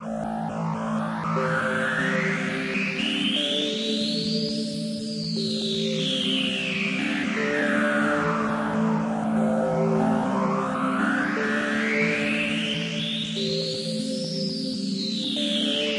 a nice intro loop, 8 bars 120bpm. hmm I wonder is it's approriate to tag this soundscape or is it too short .